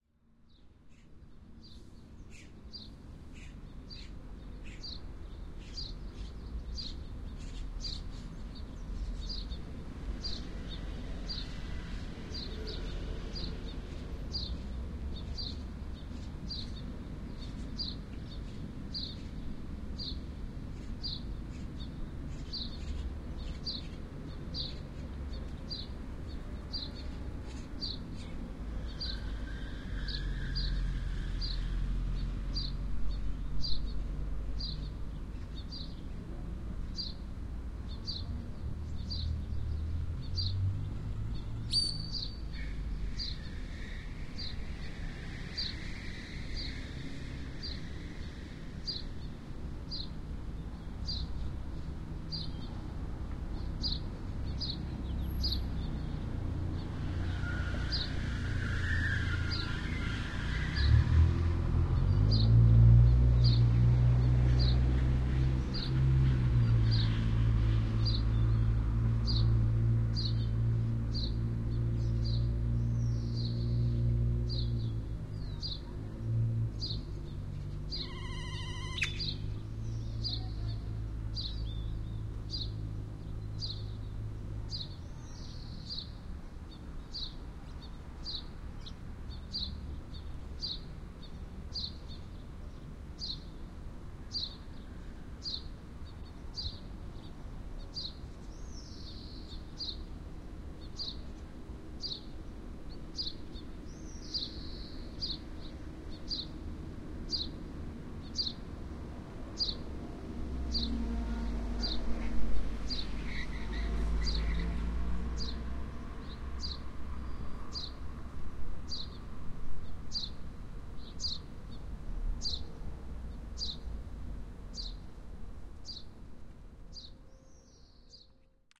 Una grabación tomada con mi dr-05x en un parque pequeño de san luis potosí